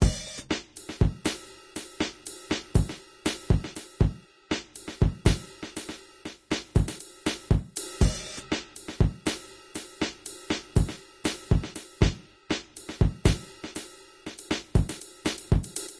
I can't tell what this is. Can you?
Dnb Drumloop
an old breakbeat loop made in within beaterator
breakbeat, rough-mix, beaterator